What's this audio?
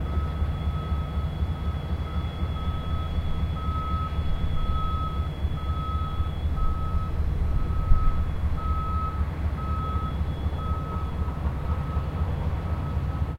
truck-noise-edit
Edited in Reaper to remove wind noise.